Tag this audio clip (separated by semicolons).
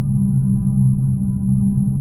Alien; game; space